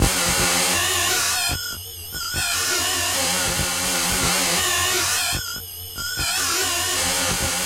A squeeky, worbly, industrial sound.
machinery, factory, mechanical, squeeky, noise, industrial, weird, robotic, robot, machine